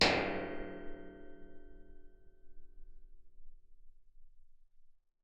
Gate hit while recorded with a piezo / contact microphone